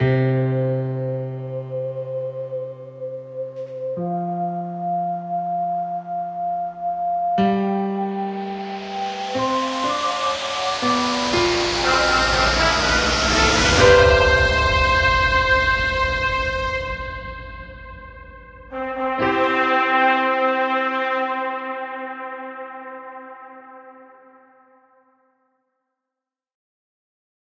Just a Levelup Sound i made for Tabletop role-playing night
This is the "Now you have time to grab a beer" version;)
Enjoy...